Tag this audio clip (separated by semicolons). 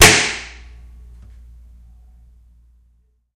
guitar; response; reverb